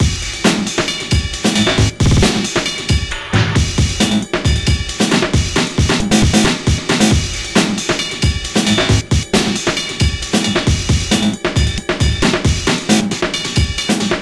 sustaining-pt2
drum-loop rework (slices) with vst slicex combination (fl studio) + a snare. loop final is editing with soundforge 7 for ...
loops, loop, jungle, breakbeat, break, snare, drum, drumloop, breakbeats, drums, beat, beats, drum-loop, drumbeats, breaks, drumbeat